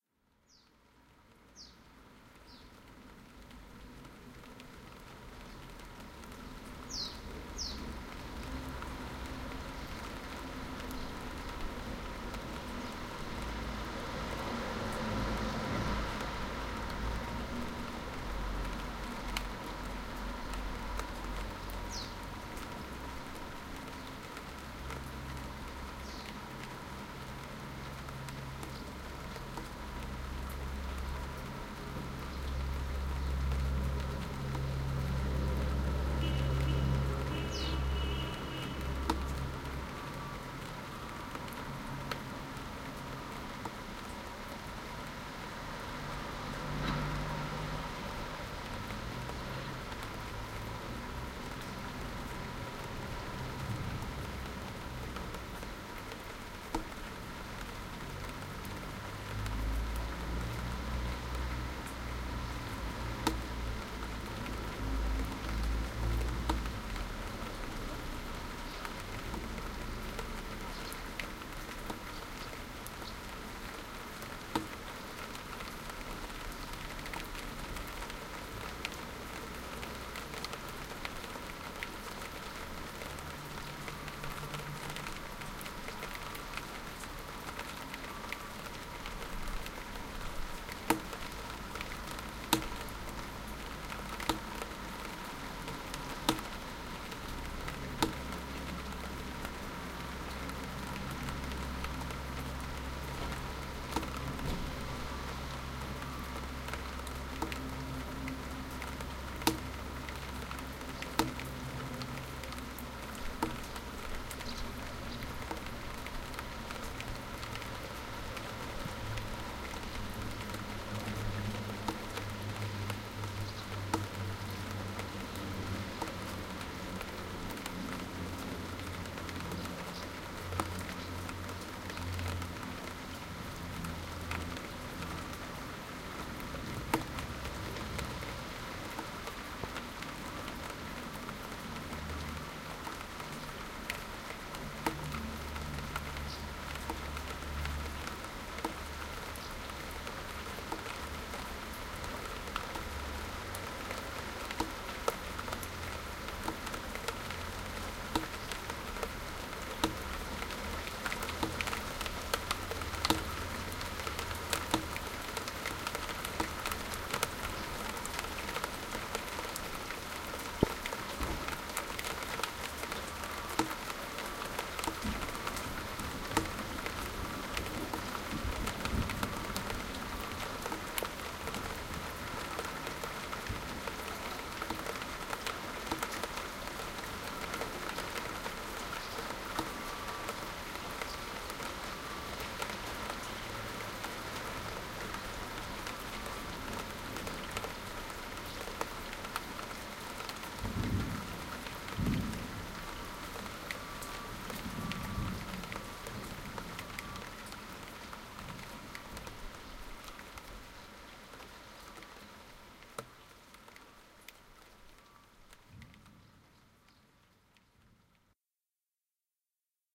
heaviernow side
Extract from an hours recording of a thunderstorm. It sounds mono because I had the mic between my house and my neighbours. Later, I moved it to the front of the house. You can hear that the rain is becoming much heavier now. You can hear traffic at the bottom of the road, horns, reversing alarms and some bird song too. The loud "drip, drip" is water drops on the window ledge. Recorded on Maplin stereo mic > Sony MZ-N1 MD on 24th Aug 2006
field-recording, horn, rain, street, thunder, urban, weather